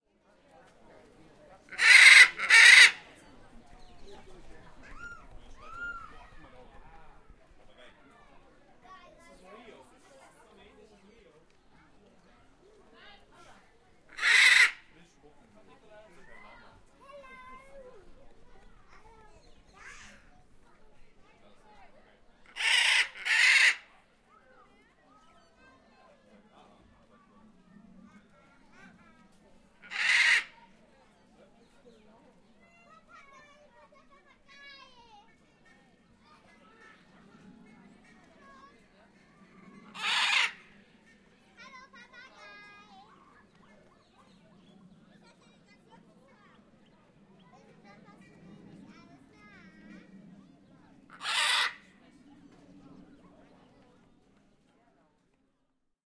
120406-000 Hyacinth Macaw zoo
Calls of the second biggest parrot of the world, Hyacinth_Macaw in the Cologne zoological garden. Zoom H4n